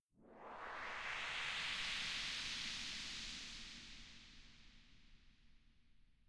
Swirling Wind
This is a remix of my spaceship takeoff sound, with some minor processing changes on it. It reminds me more of something spinning and twirling through outerspace, or a small vortex of wind.
whoosh, vortex, takeoff, outerspace, twirl, space, spinning, wind, tornado, swirl, wet, whirl